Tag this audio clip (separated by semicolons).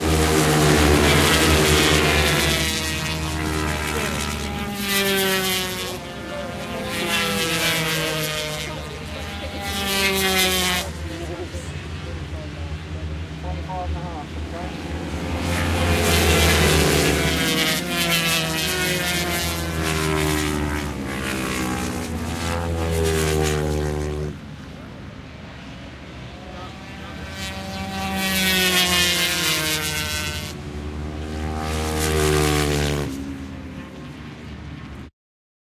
Atmosphere; Bikes; Drive; Engine; Field-Recording; Motorbikes; Noise; Outdoors; Race; Racing; Speed; Sport